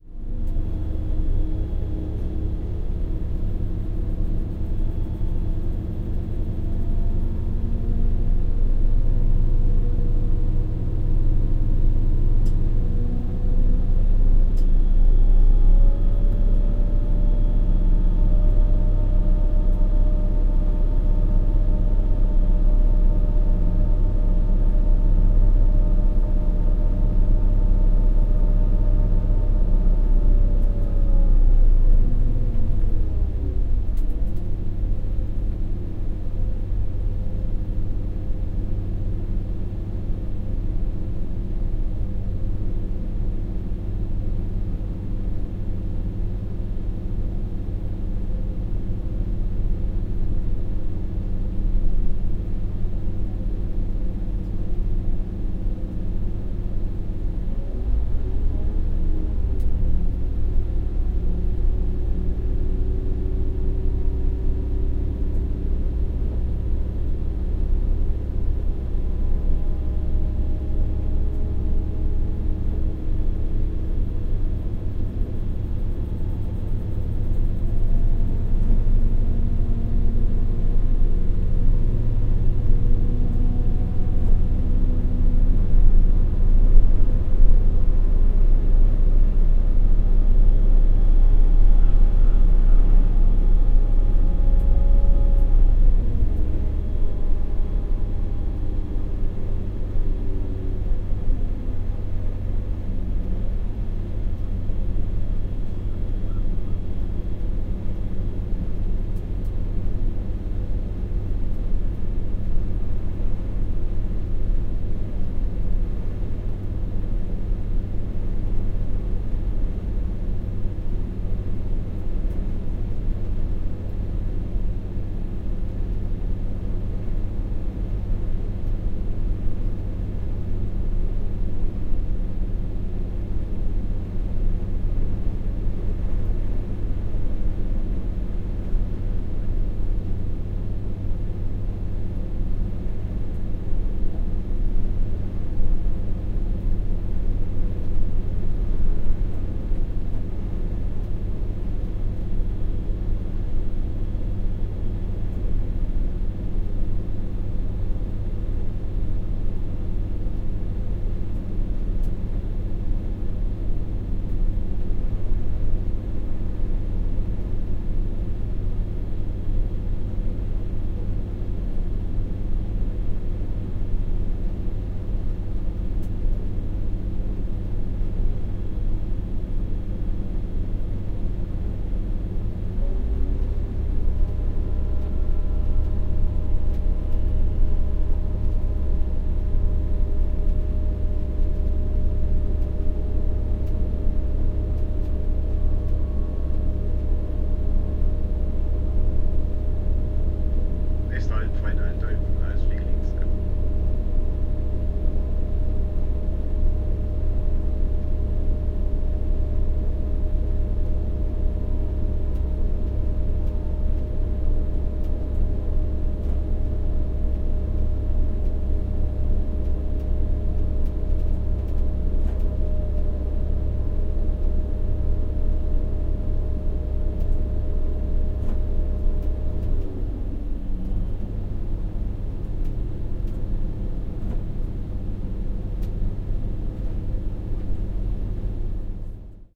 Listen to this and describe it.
unknown machine
machine, motor, unknown